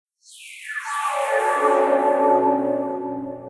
not really pad but similar
liquid, pad, sfx, short